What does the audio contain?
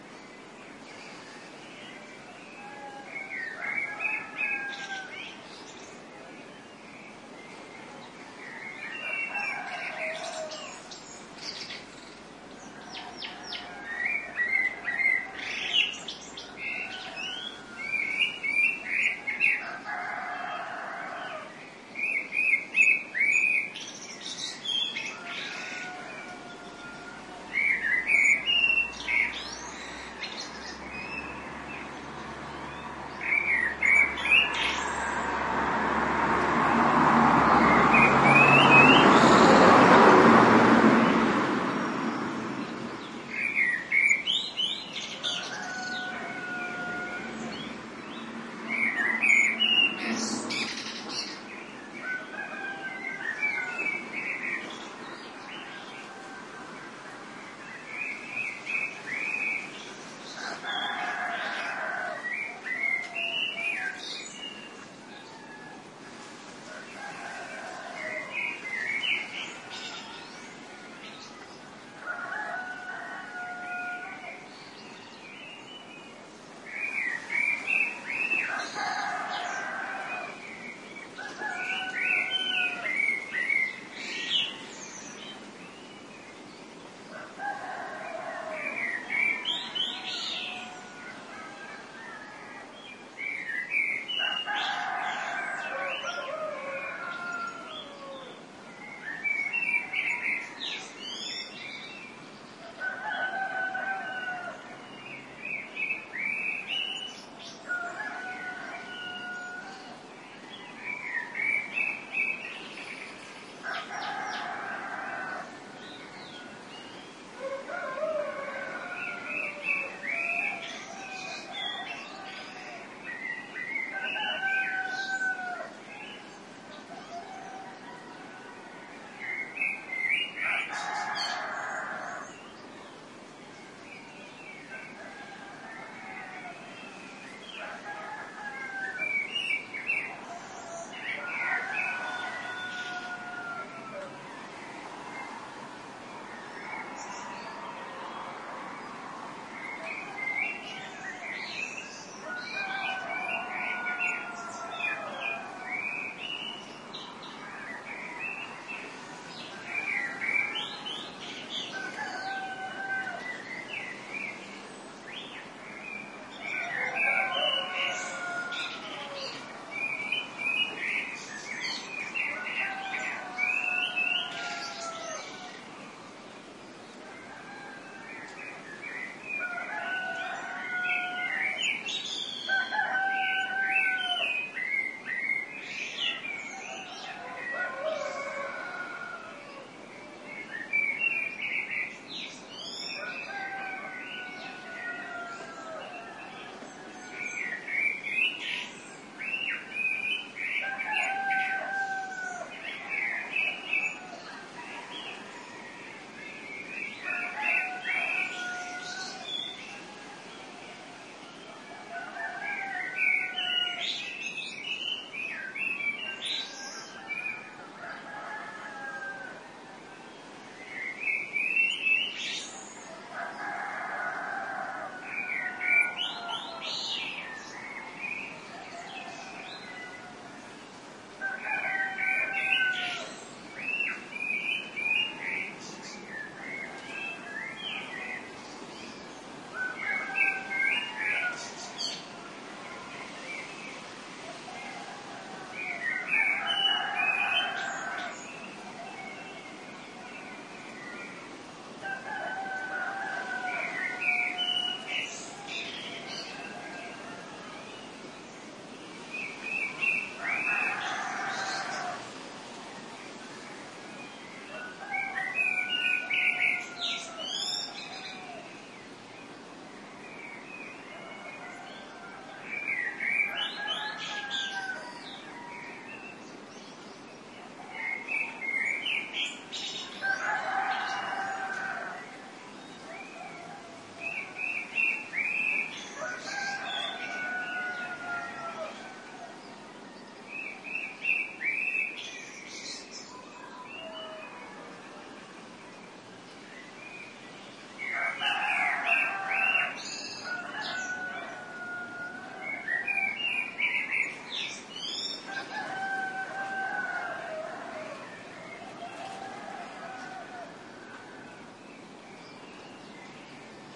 20130602 dawn.with.blackbird.01
dawn chorus with a Blackbird in foreground + cocks, barking dogs. At 0:40 a fast passing car. Primo EM172 capsules inside widscreens, FEL Microphone Amplifier BMA2, PCM-M10 recorder. Recorded near Conil de la Frontera (Cadiz Province, S Spain)
beach, birds, cocorico, kikiriki, morning, Spain, village